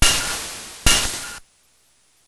These are TR 505 one shots on a Bent 505, some are 1 bar Patterns and so forth! good for a Battery Kit.
a, beatz, bent, glitch, hammertone, higher, oneshot, than